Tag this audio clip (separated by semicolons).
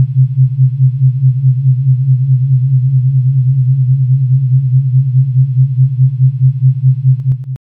sine; detuned